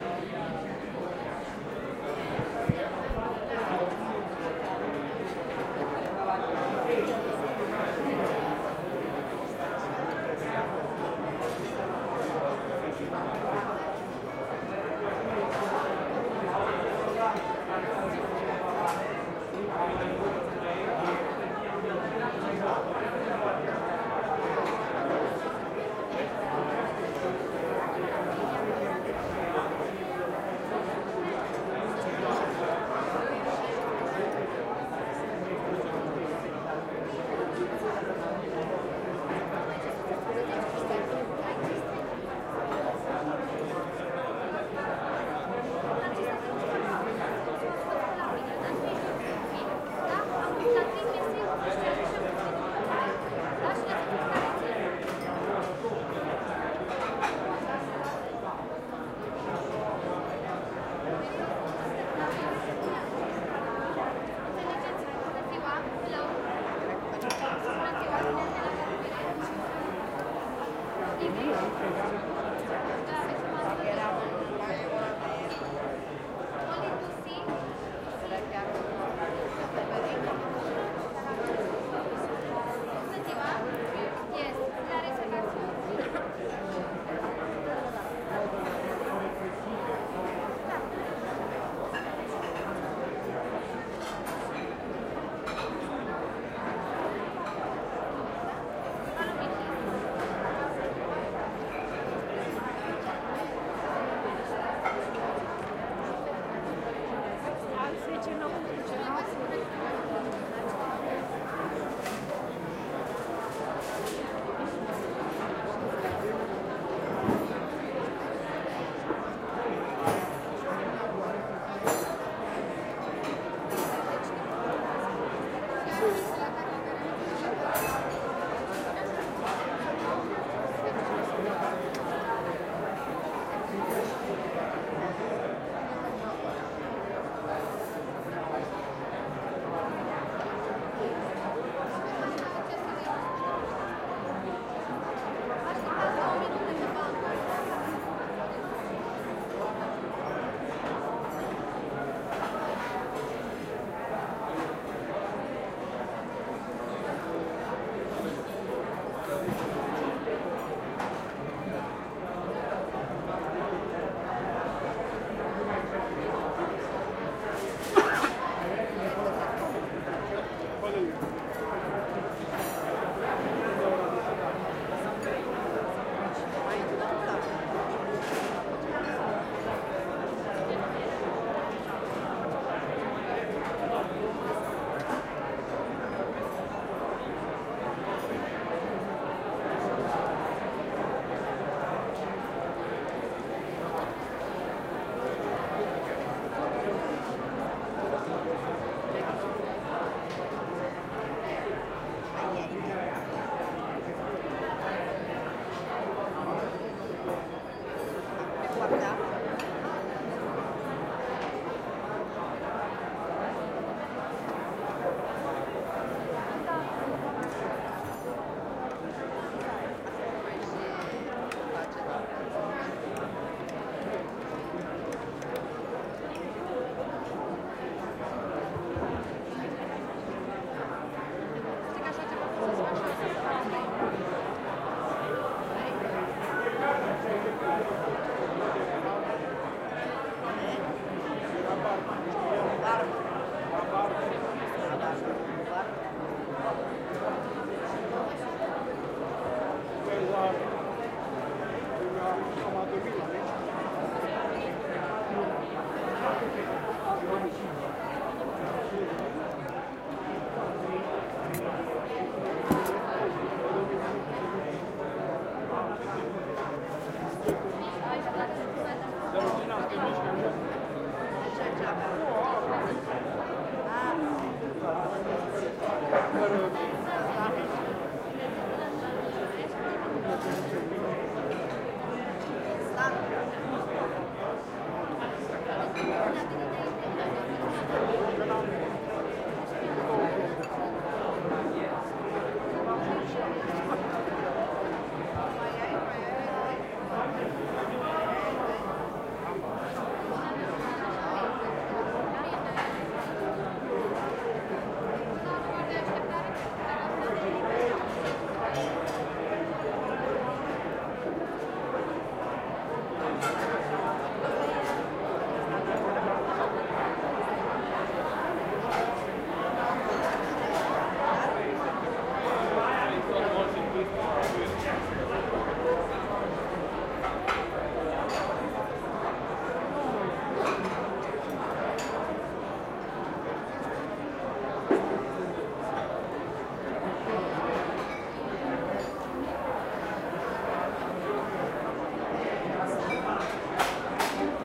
Bukarest 2015 Restaurant Terasse mittags MS
A large restaurant terrace in Bukarest, Romania, pedestrian zone, no music, talking, cutlery ...
Air, Ambiance, Open, People, Restaurant, terrace